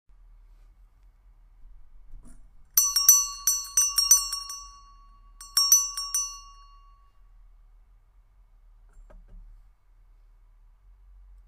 Bell, ringing, ring
ring, ringing, Bell